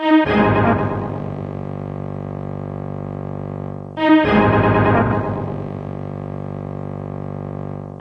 A kind of loop or something like, recorded from broken Medeli M30 synth, warped in Ableton.
broken, lo-fi, loop, motion